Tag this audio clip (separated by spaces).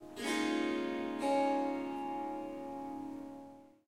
Ethnic Harp Indian Melodic Melody Swarmandal Swar-sangam Swarsangam